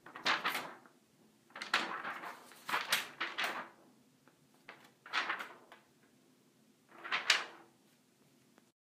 Paper Flap
Waving a piece of paper around.
newspaper, paper, book, flap, page, flip, magazine, turn